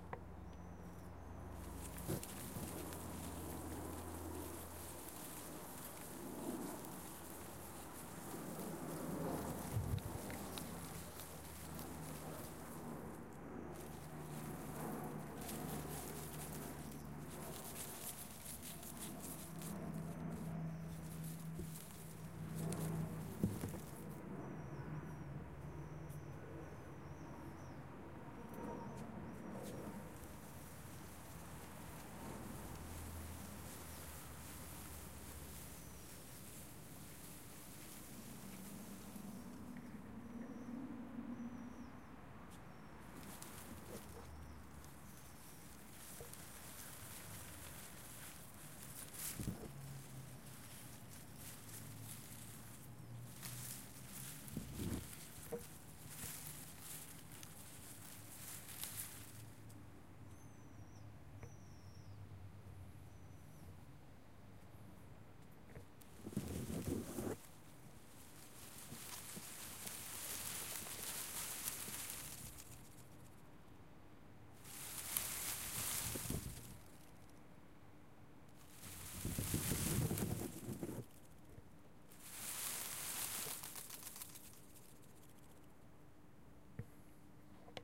Traffic and plants moving on the wind
The wind and myself moving some plants and trees at TEA-Museum in Santa Cruz de Tenerife, you can hear the car traffic form a street on the background